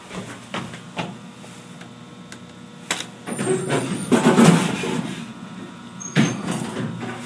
Dover Impulse Traction elevator closing
Recorded in 2012 with an iPhone 4S.
closing, doors, elevator, close, door